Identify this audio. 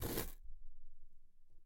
Carton move 7
Those are a few movement and rubbing sounds made with or on carton. Might get in handy when working with a carton-based world (I made them for that purpose).
Carton, effects, gamesound, movement, paper, rub, short, sound-design, sound-fx